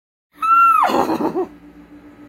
Horse committing horse-play